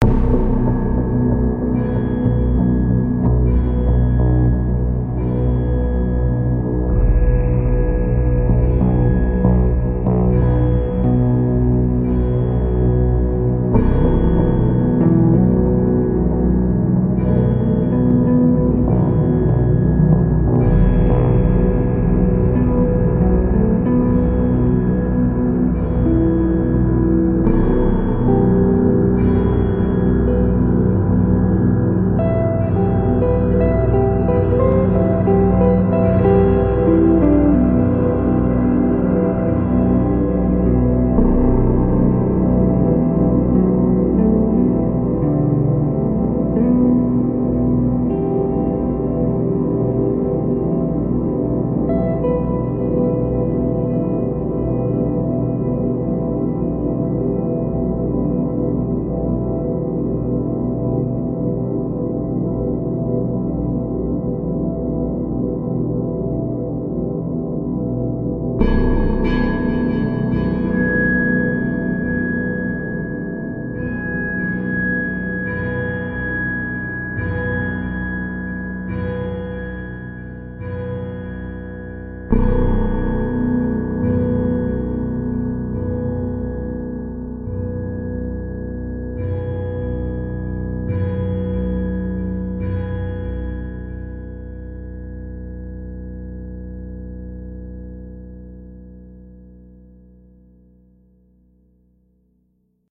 organic metalic ambience
effected guitars provide a deep, slightly melodic soundscape while some overlayed VST synths give a metalic sound. The result is an organic, yet mechanical ambience blend.
It's not exactly the same, but it's the closest I've got ^^
ambient, broken, creepy, dark, ghost, ghostly, hallway, haunted, horror, metalic, organic, scary, sinister, spooky